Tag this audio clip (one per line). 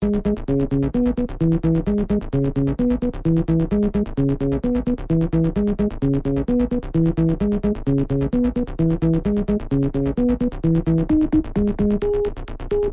download-background-music
music-loops